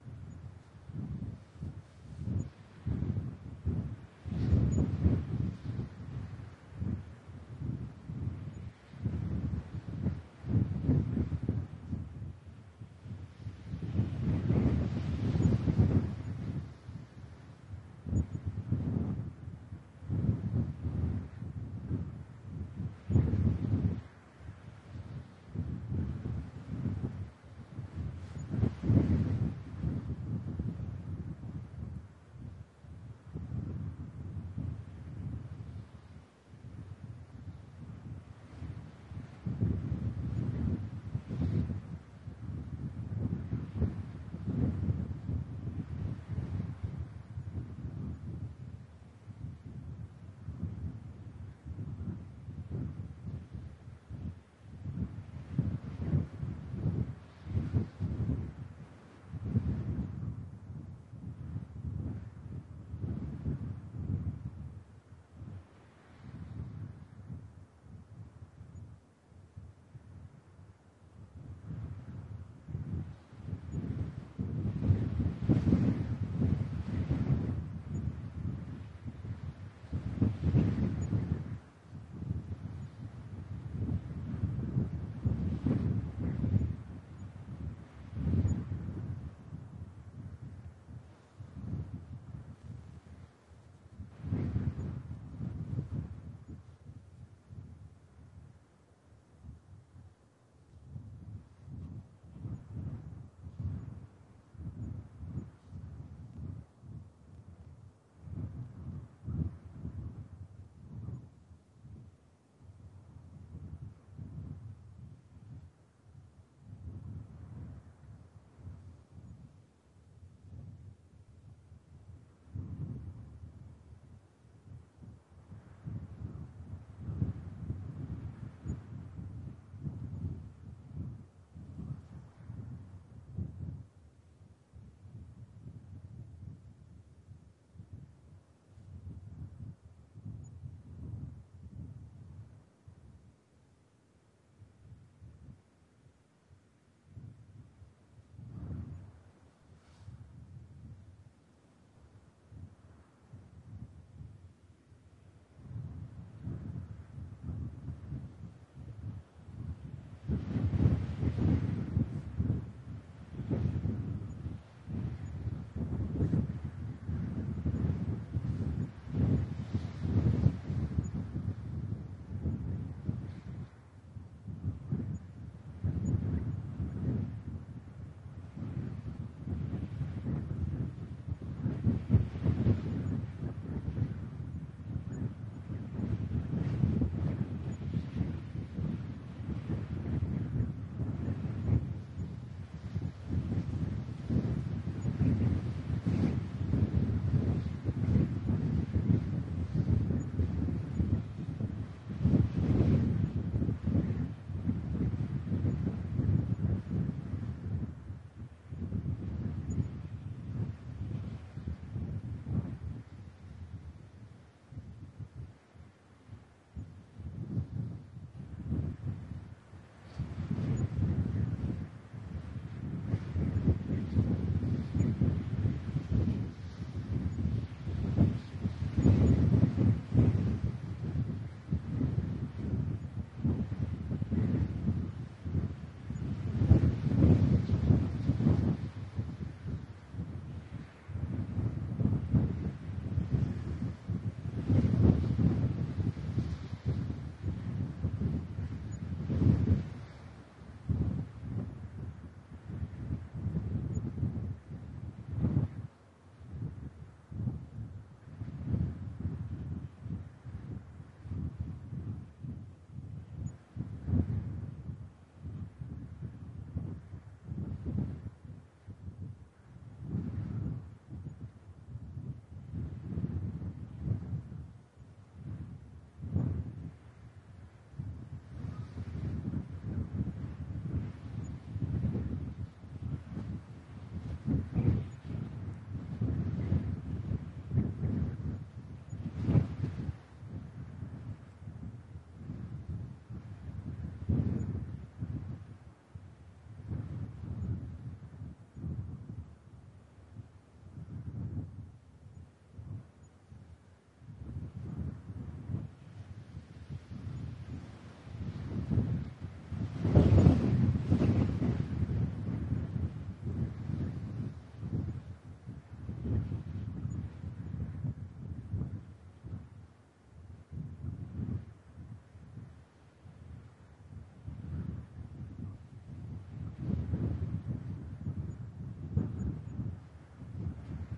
windy-mountain-plains by dwightsabeast (improved)
Edit of "windy mountain plains" by DwightSaBeast. Interference has been removed, as well as various noises caused by the author to create as smooth a resource as possible.
wind, mountain-wind